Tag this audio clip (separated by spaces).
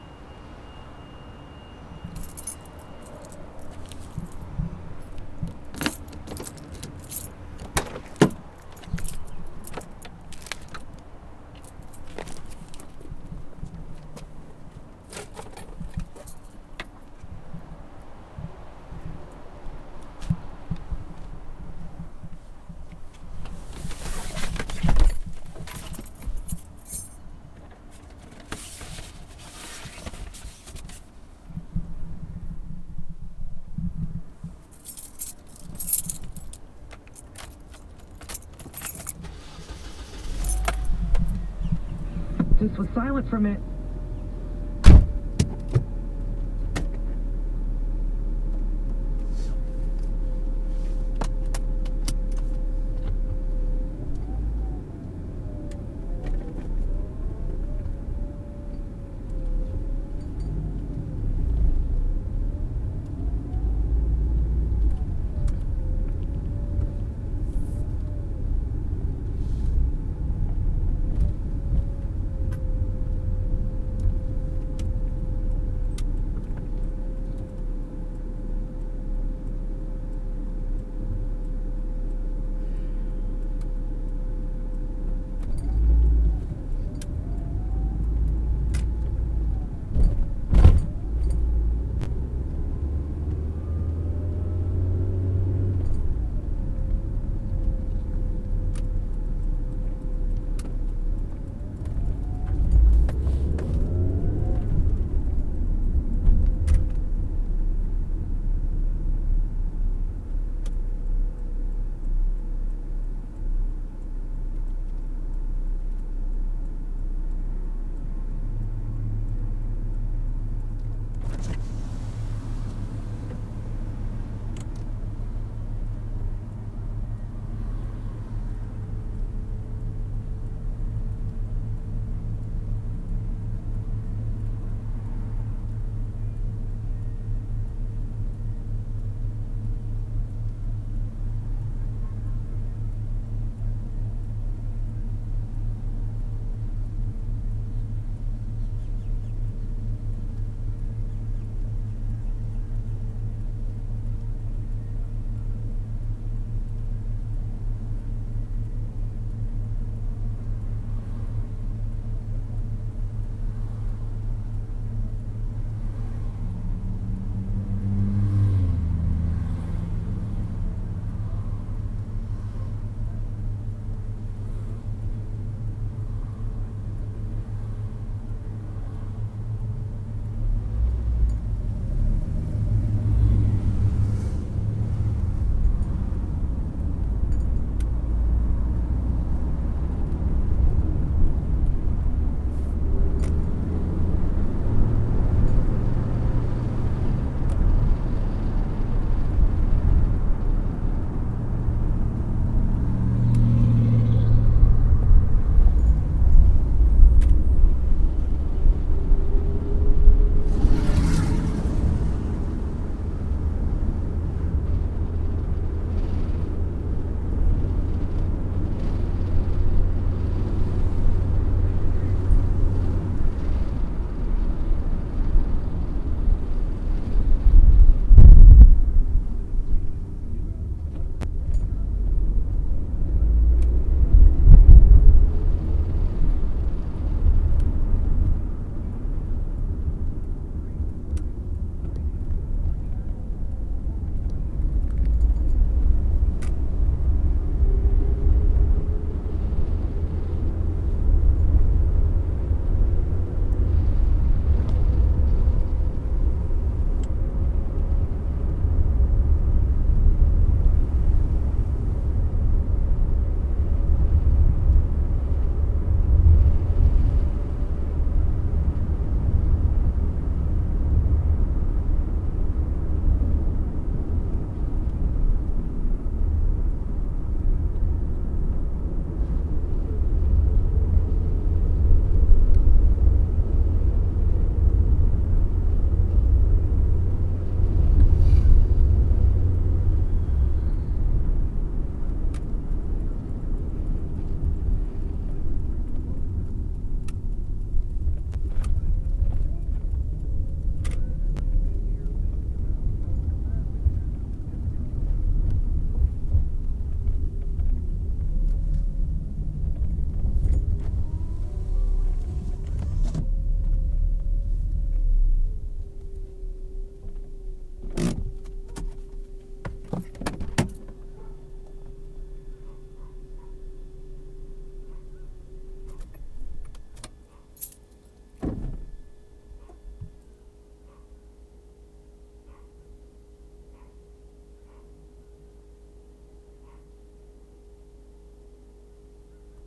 automotive car field-recording